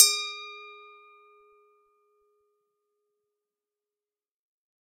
Wine glass, tuned with water, being hit with an improvised percussion stick made from chopstick and a piece of plastic. Recorded with Olympus LS-10 (no zoom) in a small reverberating bathroom, edited in Audacity. The whole pack intended to be used as a virtual instrument.
Note G#4 (Root note C5, 440Hz).